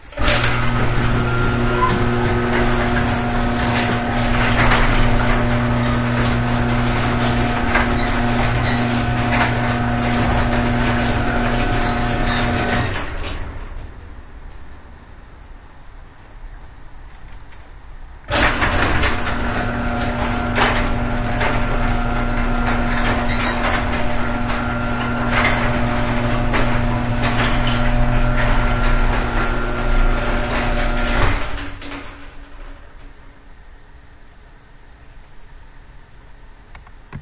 The sound the garage door makes in a house. Some house garages like this one are very loud when they open and close